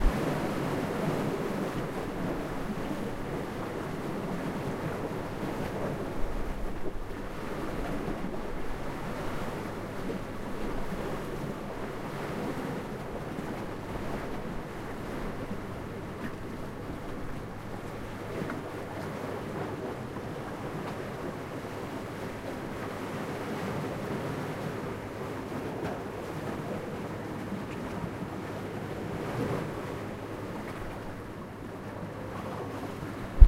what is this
wind and waves ambience
Sound of waves and wind.
wind, seashore, waves, field-recording, beach, sea, shore, water